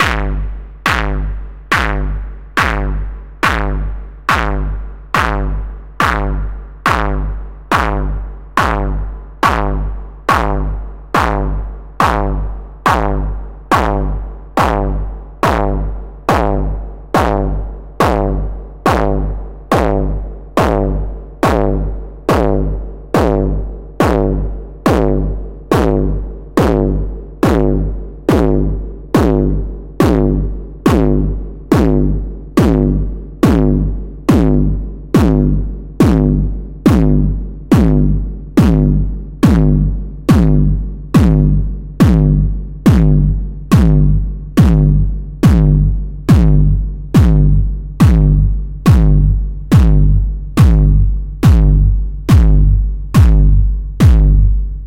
A set of 64 distorted kicks with gradually lowered equalizer frequency. Finaly the kicks are processed through a reverb. The kick is only generated with SonicCharge MicroTonic. Good for oldskool hardstyle, nustyle hardstyle, jumpstyle and hardcore.